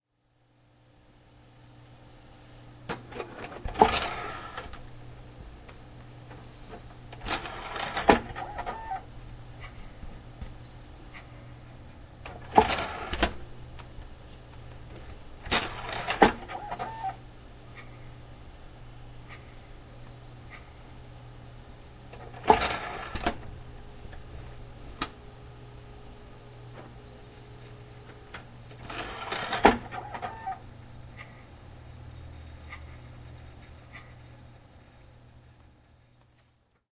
Here I am, opening my pc's CD drive 3 times. Recorded with plextalk ptp1 internal mics.